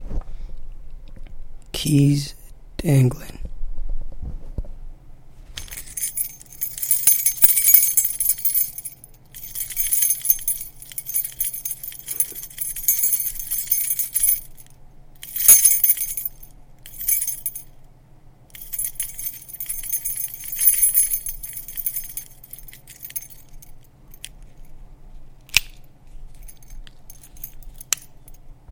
Dangling keys. Recorded with a condenser mic.
metal-on-metal, dangling, keys